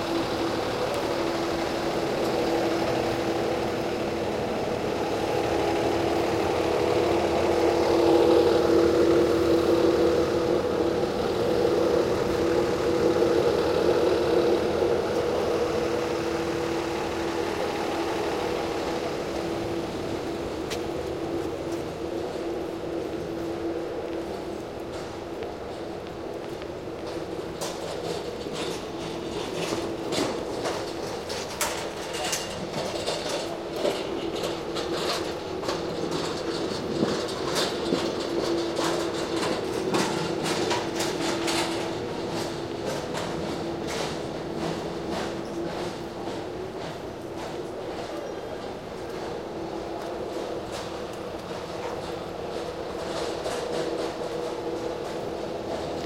Delivery Truck Idling Pedicab passing by in French Quarter
Recorded with an H4n Zoom in the French Quarter New Orleans.
French-Quarter, New-Orleans, Pedicab